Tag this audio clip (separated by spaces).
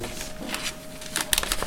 book turning-pages paper